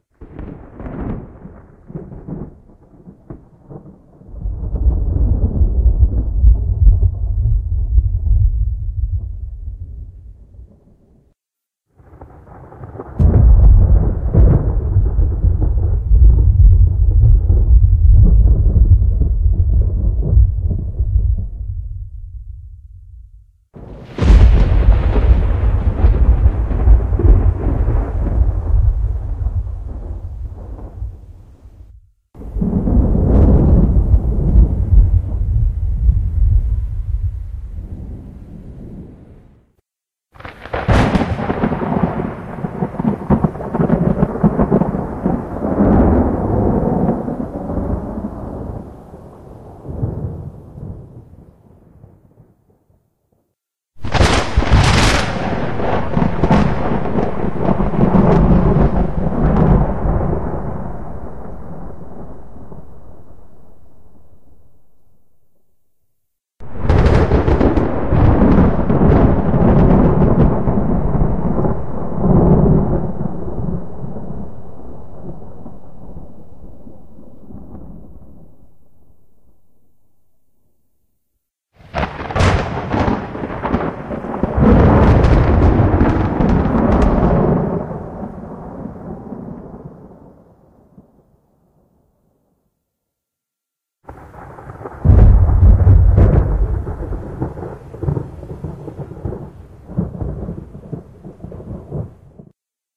Lighting Strike and Thunder
Thunder and lightning recorded by me the summer 2012 and then cut in Audiacity.
Lightning, Thunder, Sweden, Field-recording